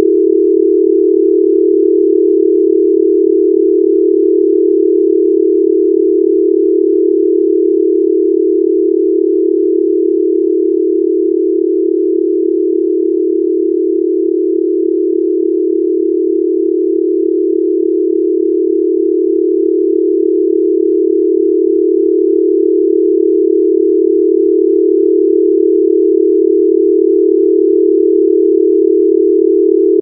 the sample is created out of an image from a place in vienna